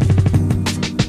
92bpm QLD-SKQQL Scratchin Like The Koala - 019 classic
turntablism, record-scratch